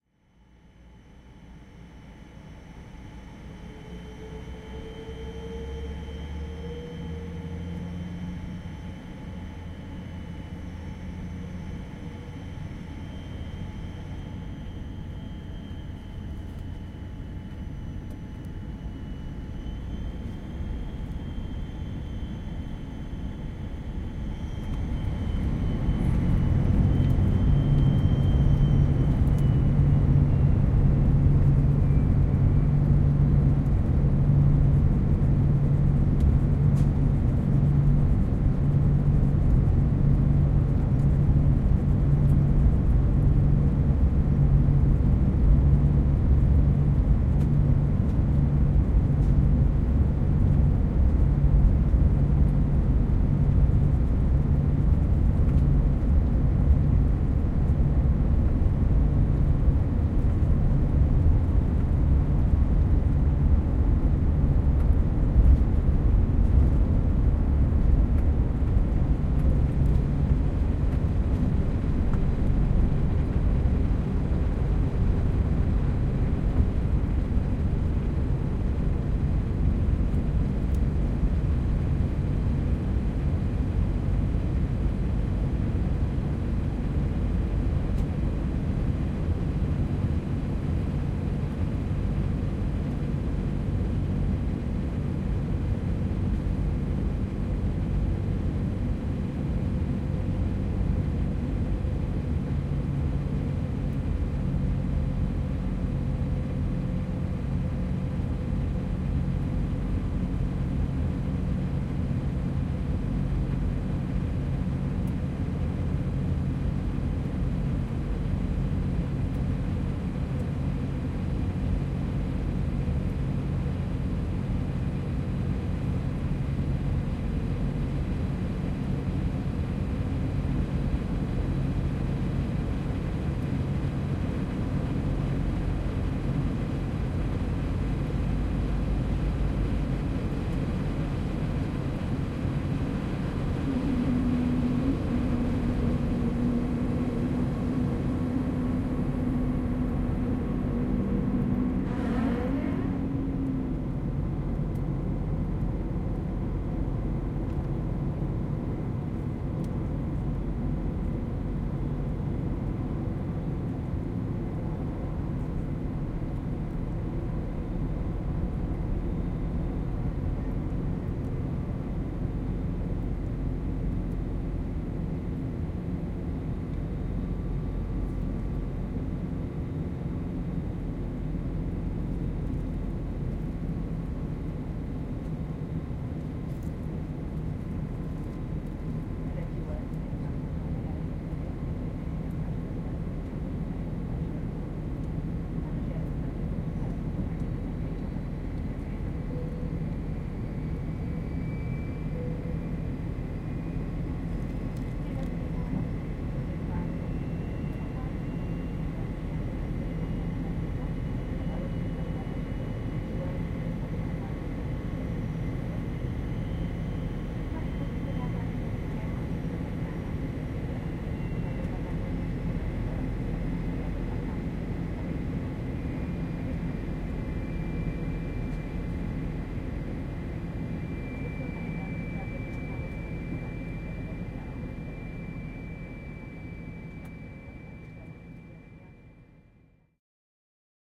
-11 excerpt 4ch ATMO flight dbx-del drone while taxi and takeoff
Recording inside of (probably) Airbus 380 taxiing and takeoff on flight from Warsaw to Dubai, 30.12.2016.
4 channel recording made with Zoom H2n
taxiing, engine, takeoff, drone